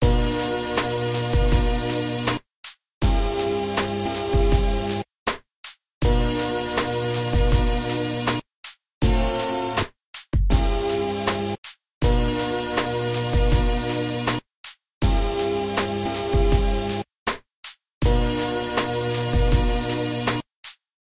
sampled hip hop drum loop
ambient, atmospheric, bass, boombap, Bright, drum, effect, feedback, frequency, hats, high, hiphop, kick, metallic, Oscillation, pattern, piercing, processing, Random, Repeating, ringing, sample, snare, sound, Tech